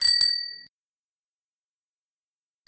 Bicycle Bell from BikeKitchen Augsburg 09
Stand-alone ringing of a bicycle bell from the self-help repair shop BikeKitchen in Augsburg, Germany